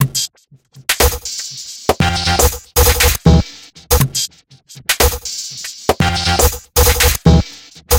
Massive Loop -18
A four bar electronic loop at 120 bpm created with the Massive ensemble within Reaktor 5 from Native Instruments. A loop with an experimental feel. Normalised and mastered using several plugins within Cubase SX.
electronic, rhythmic, electro, experimental, loop, 120bpm